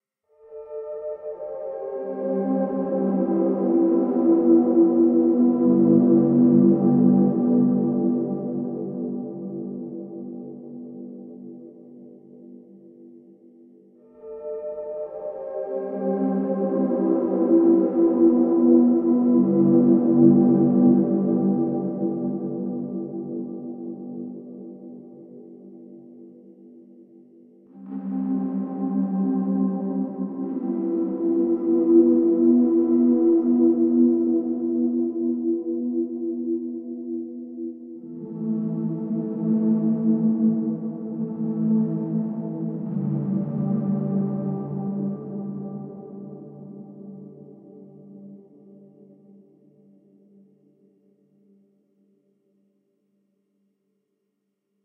ESCURSIONI MODULARI-SEQPATCH 01a
Modular sequence patch with a Modular Synthesizer System
Mainly Doepfer / Buchla / Dreadbox / DIY modules